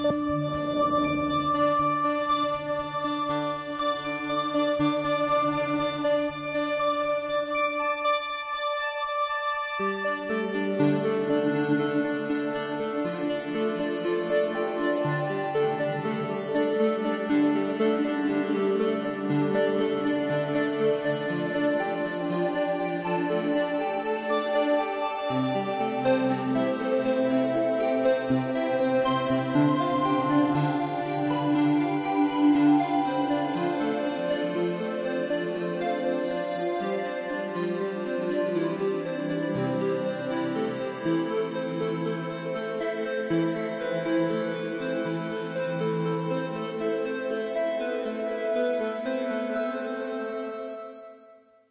Recorded from the Synthesizer